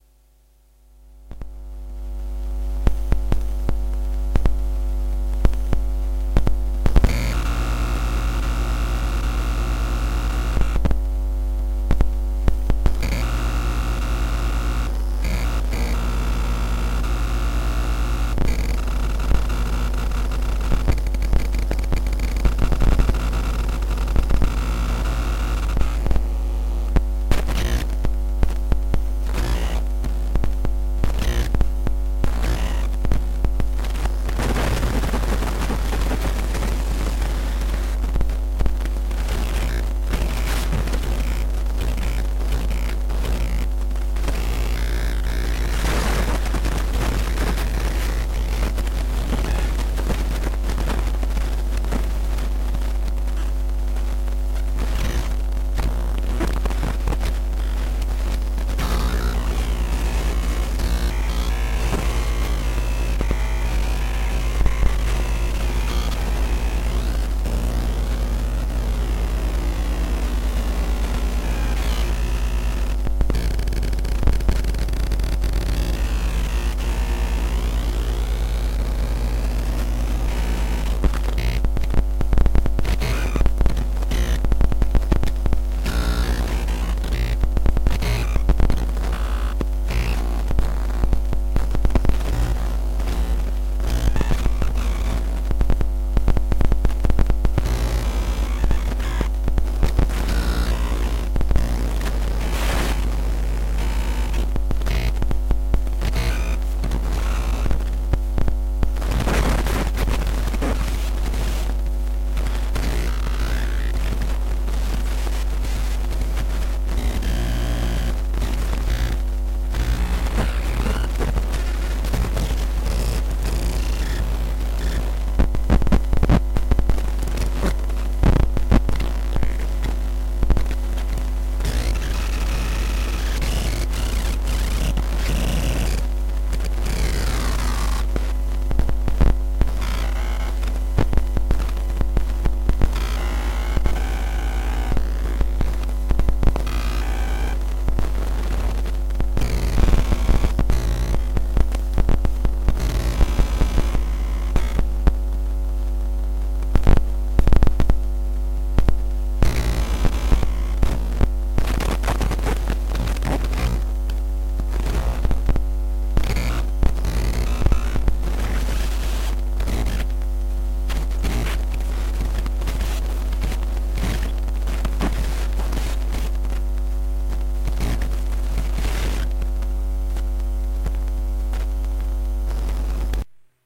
Phone Circuitry
An LG G2 hooked up to my DR-01 and messed around with. Weird sounds.
interference, phone, hum, glitch, electronic, buzz, noise, lo-fi, digital